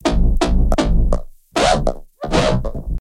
A Tight grip type sound.lol